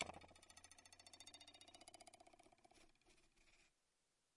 Queneau Douing 02
battement de regle sur le bord d'une table
psychedelic, metal, bizarre, vibrate, douing